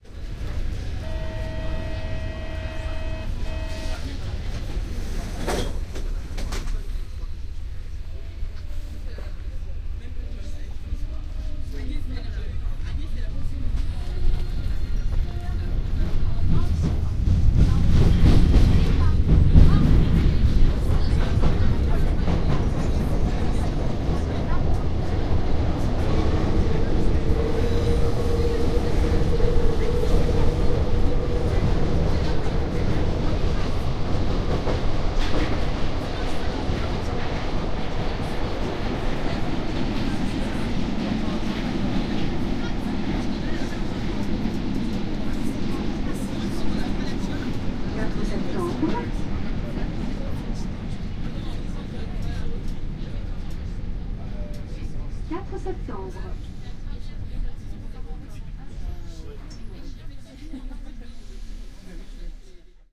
Parisian metro, line 3. recorded with OKM II headphone mics and a .m-audio microtrack. Bon Voyage!